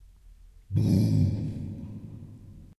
monstruo reverb
a sound for dark movies or suspense movies